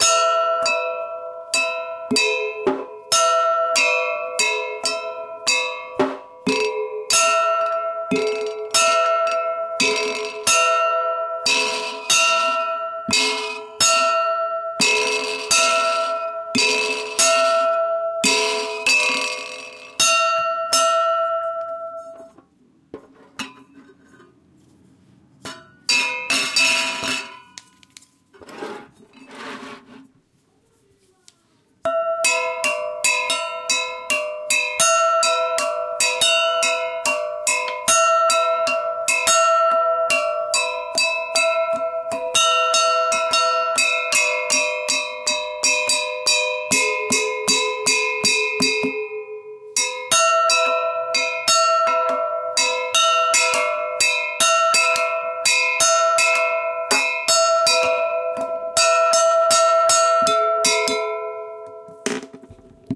recorded with zoom H2, ready an sliced for morphagene